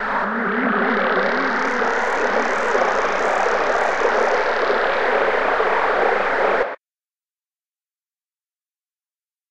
tumultuous alien sounds
space-ships, alien, sf, outerspace